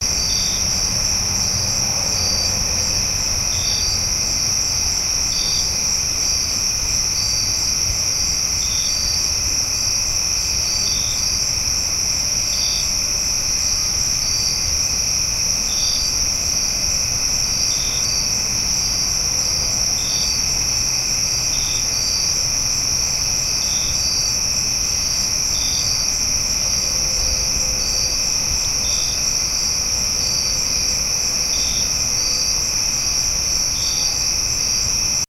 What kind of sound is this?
The night time sounds of sub-urban Virginia in Mid-Auguest.
summer, outdoors, crickets, night, nature, insects